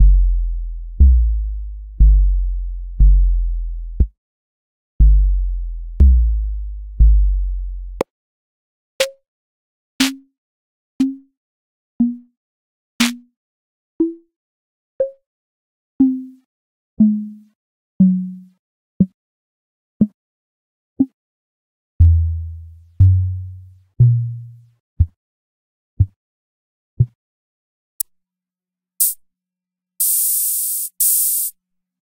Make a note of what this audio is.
VOLCA DRUM SAMPLE CHAIN x32.wavSAMPLE CHAIN
VOLCA DRUM SAMPLEs korg volca for octatrack SAMPLE CHAIN